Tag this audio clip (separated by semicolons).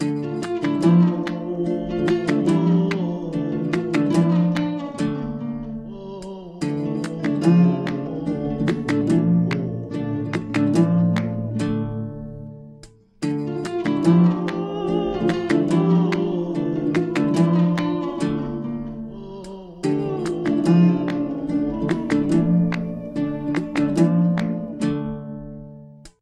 orientalis; guitar; oriental; rythm; loop; voice